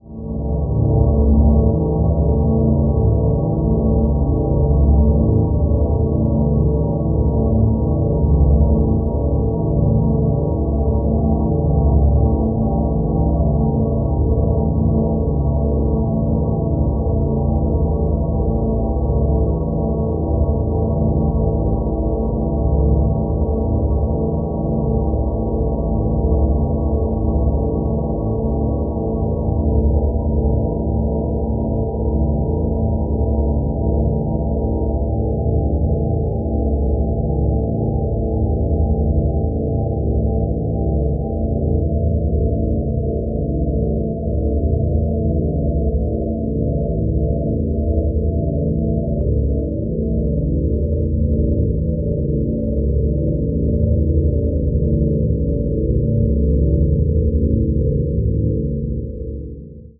sailing-vessel-at-sea-sunset
sonification, dare-22, ambient, dark, drone, img2snd